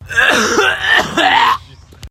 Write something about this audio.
It's a cough.
flu, sneeze, grunt, throat, cough, cold